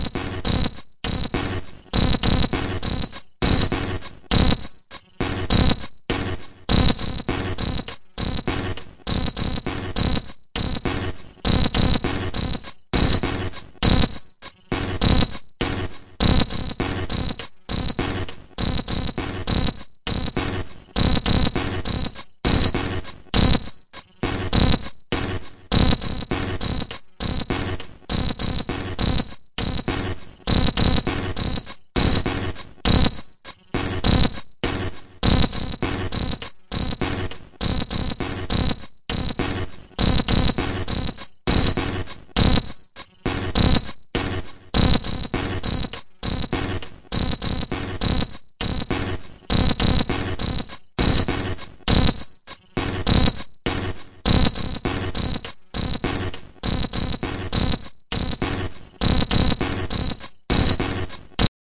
Audio 13Patt 13 100 bpm13
The sound chip of the HR 16 has a LOT of pins. A ribbon cable out to a connection box allows an enormous number of amazing possibilities. These sounds are all coming directly out of the Alesis, with no processing. I made 20 of these using pattern 13, a pattern I'd programmed a long time ago. But I could have made 200.. there's so many permutations.
circuitbent, percussive, glitch, Alesis